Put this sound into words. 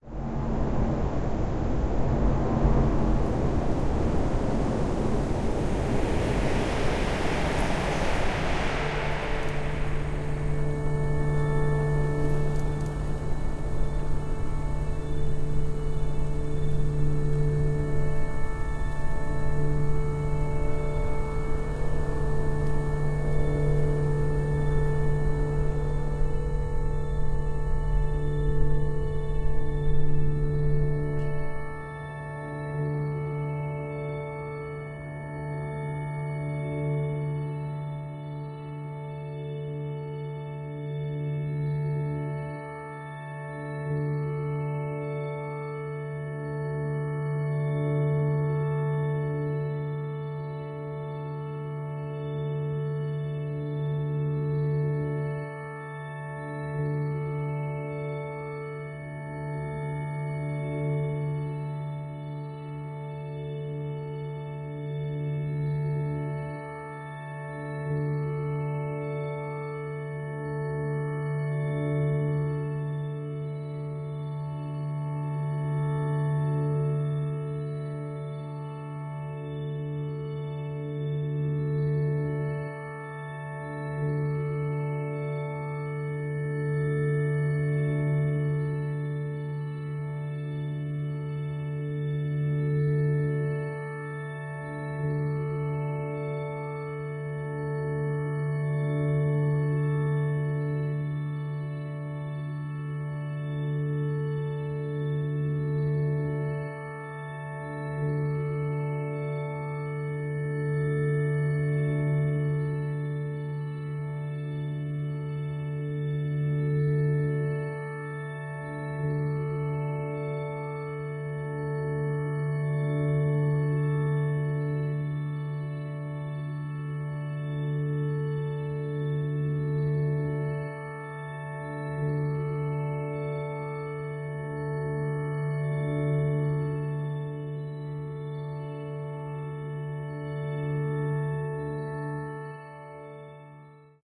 Fantastic apparition
A high pitched glowing hum with sparkles
whistle, pitch, high, magic, chime, hum, sparkle